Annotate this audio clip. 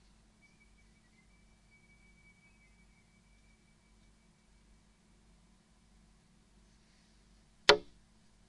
Like #001-0044 these little bits of sounds can be good to have in your toolbox.
I forgot to tell you what this sound is, namely, the extremly short beep when a fire alarm unit begins to run out its battery.
bits, lumps, fragments, buiding-elements